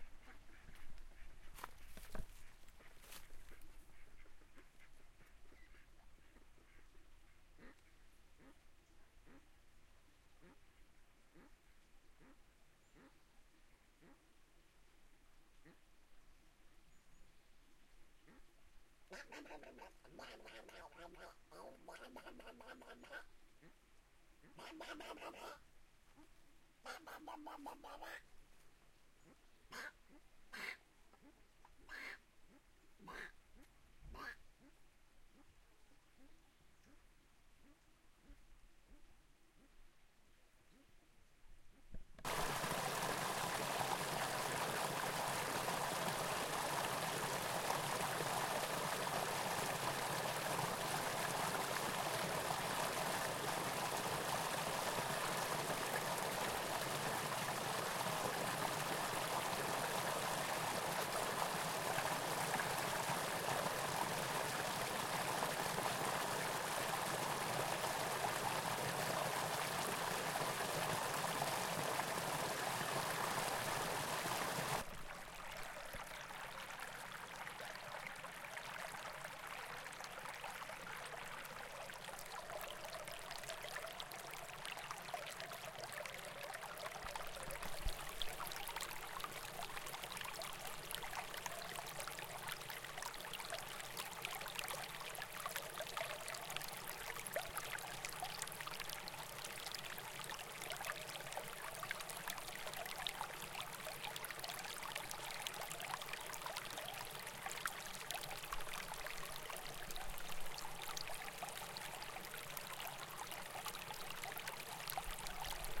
Microremous d'eau
small brook south of France, water gurgling,very close take,Zoom H4N internal mics ,stereo,48kHz,16bits,wave
field, water, outside